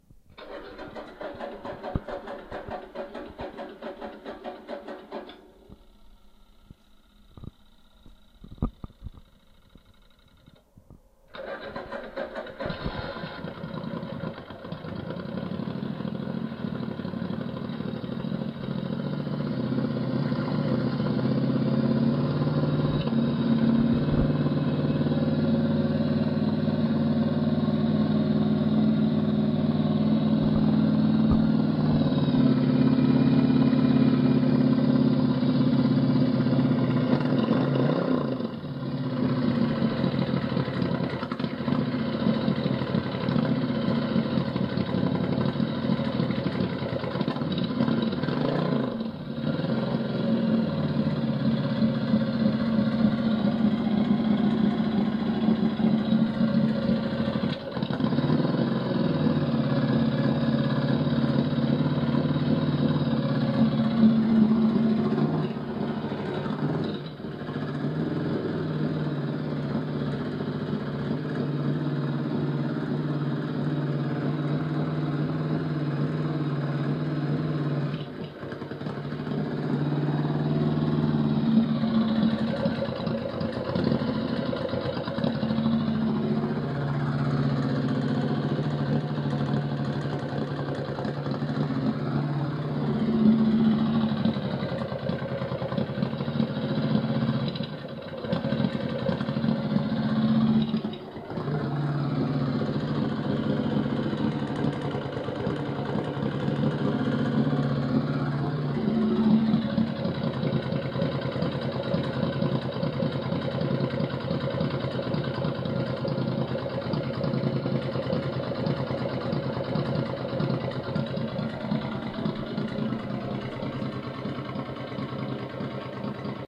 Starting bike engine after long time not in use
Starting begins with fuel pump sound followed by engine start motor. Some tuning of engine before the idle running is fairly normal.
jobs, home